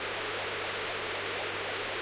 The Mute Synth 2 is mono, but I have used Audacity cut and put together different sections of a recording to obtain a stereo rhythmic loop.
Mute-Synth-2, Mute-Synth-II, noise, rhythm, rhythmic, seamless-loop, stereo